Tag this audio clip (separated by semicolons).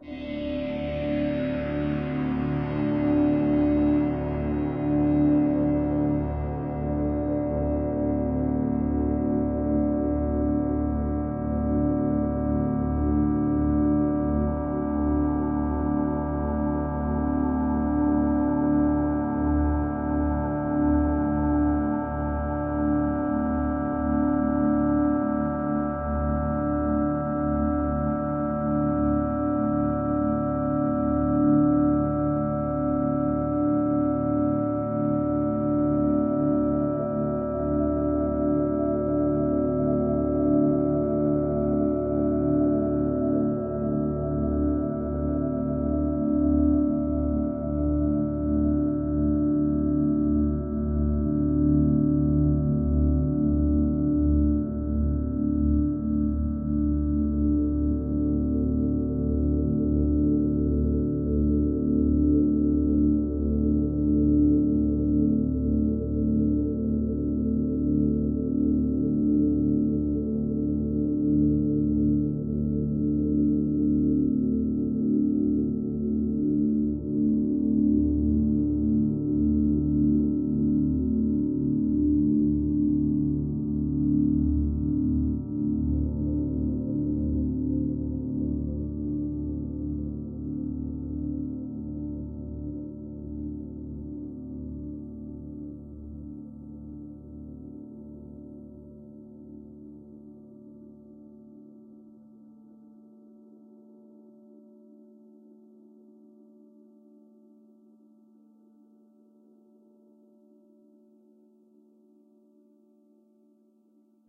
overtones; ambient